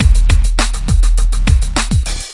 Stezzer 102 1 break
A breakbeat I made using various free percussive samples, arranged in free tracker program, Jeskola Buzz at 102bpm, enjoy :)